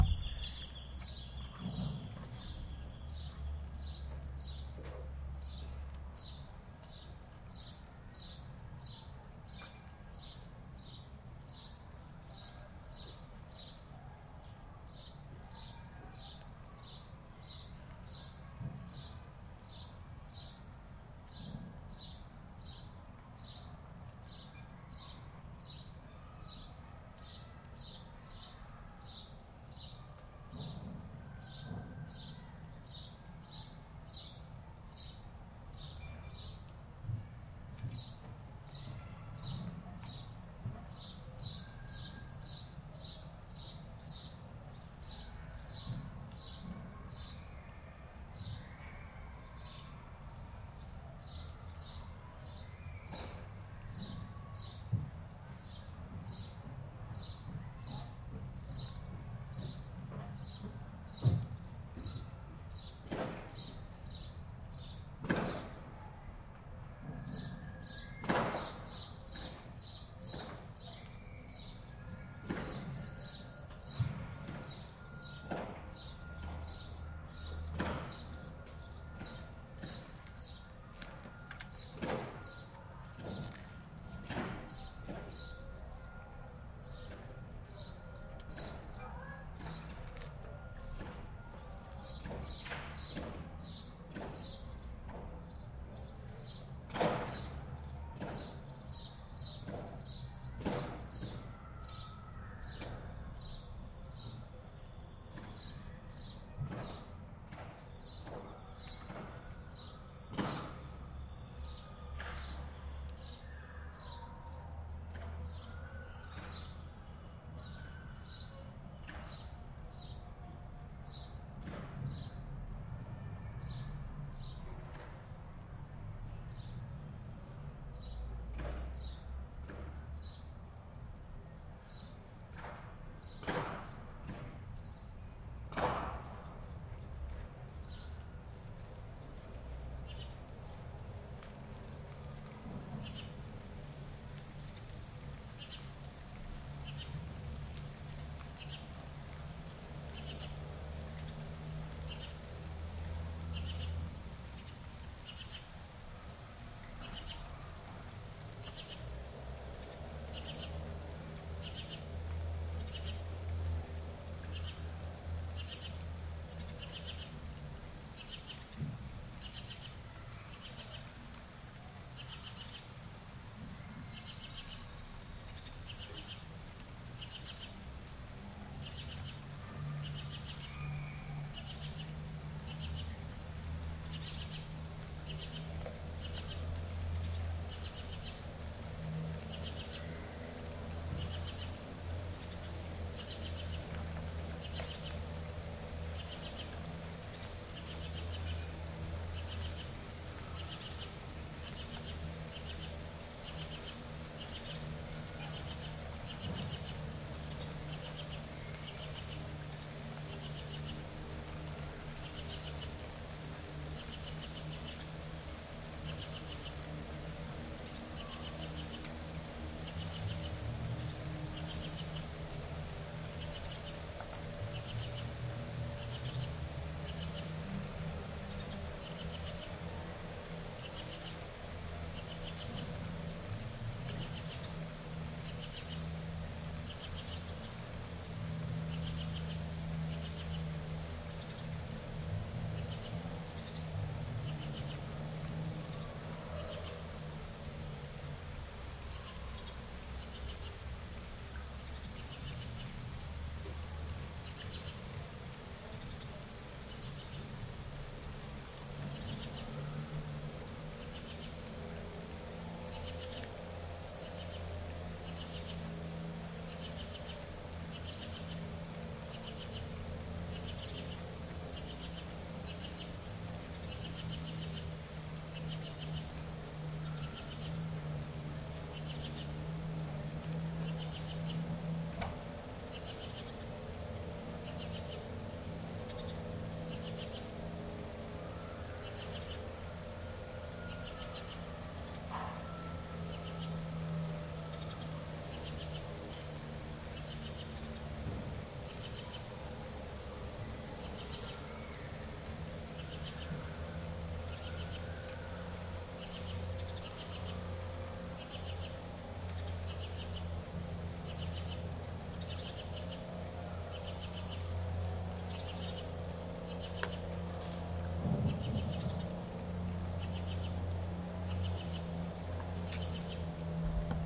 Distant Gunshots in Mexico City
gunfire in the distance and it starts raining